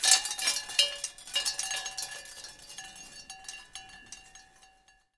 wind chimes 04
This is recorded from wind chimes, it`s a almost 2 meter long string with small, different sized, plastic like bowls on it. I recorded it hung up on the wall, because i needed it to sound more percussive.
fx, stereo, noise, effect, recording, windchimes, atmosphere